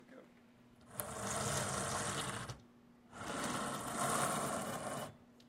chair drag on tile
Dragging a chair back and forth across tiled floors
narrative, recording, chair